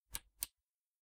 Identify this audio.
Button Click 05
The click of a small button being pressed and released.
The button belongs to a tape cassette player.